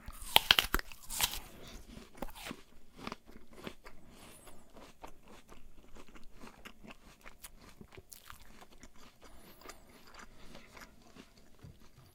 Me, accidently, recording myself eating an apple while trying a new microphone.